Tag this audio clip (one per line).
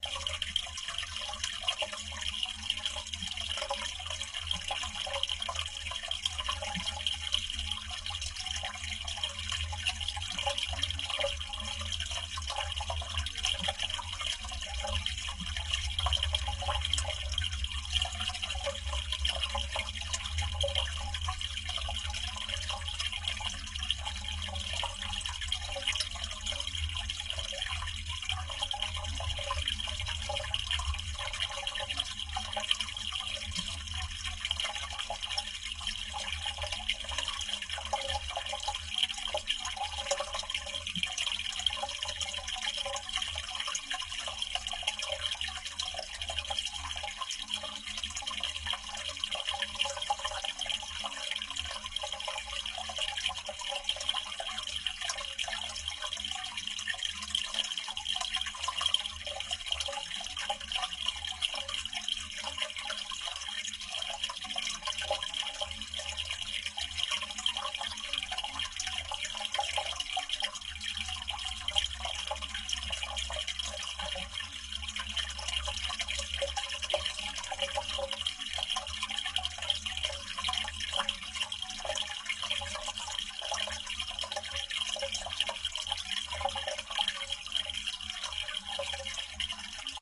movie-sound
water
water-spring
water-tank
ambient
field-recording
pipe
sound-effect